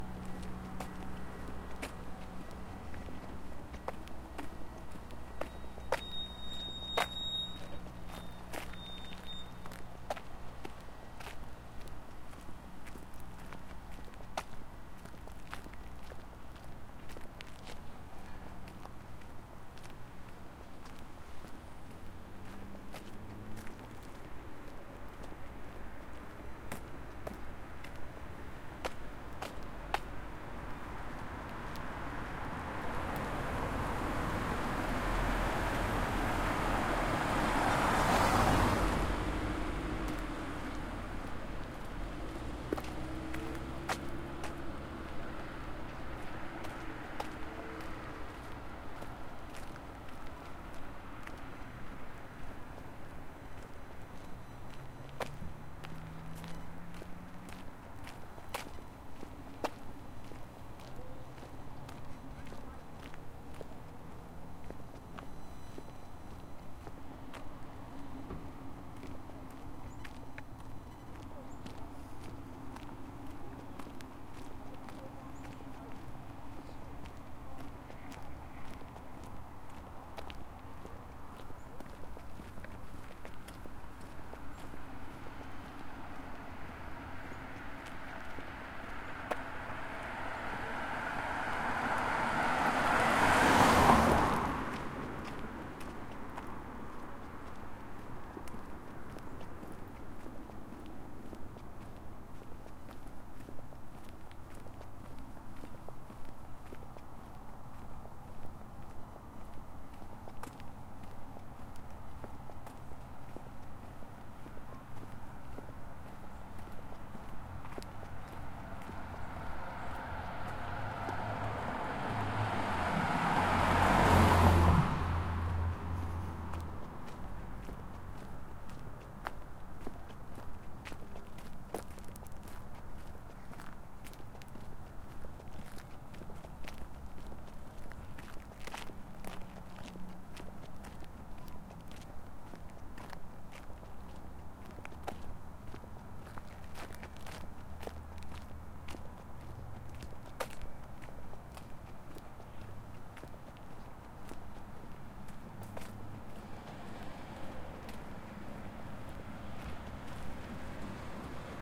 WALKING STREET SUBURB 01
A recording of myself walking through a quiet suburb with a Tascam DR-40
suburb, street, ambience, walking